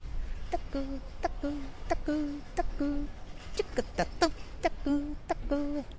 AmCS JH TI23 takoe
Sound collected at Amsterdam Central Station as part of the Genetic Choir's Loop-Copy-Mutate project
Central-Station, Time, Amsterdam